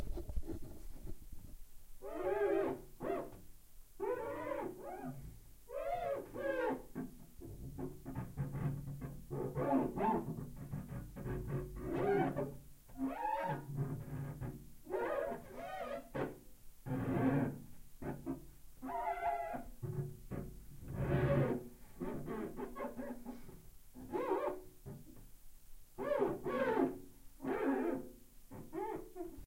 Quitschen Hand Glas
squeak, move, Window, quitschen, Hand, Fenster
move, quitschen